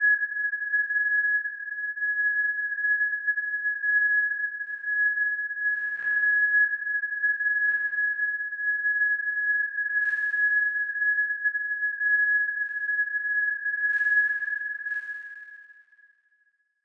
tone rail
this is just a simple tonal texture that might make a good pad or lead if put in the right sampler...